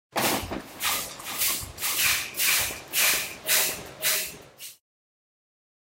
Repeated and overlapped shuffling of worn sandals on cement floor. Recorded on mac Apple built in computer microphone. Sound was further manipulated in Reaper sound editor.
experimental-audio, f13, feet, fnd112, repeat, shluff, shuffle